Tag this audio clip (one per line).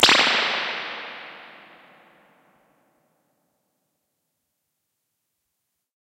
analog
convolution
hardware
impulse
ir
response
reverb
spring
tape
tube
vintage
warm